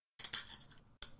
#1 Metal Click
Probably with a Tin Can.
Metal, Metallic